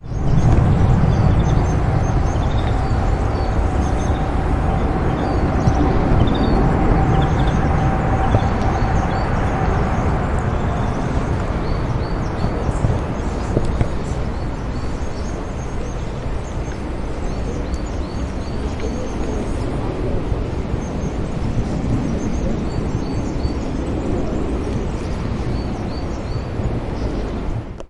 Mallarenga petita i Gafarró
We can hear different sounds of birds, a Coal tit and Serin. Recorded with a Zoom H1 recorder.
Bird, Coal-tit, Deltasona, Mallarenga, Pineda, PratdeLlobregat, Serin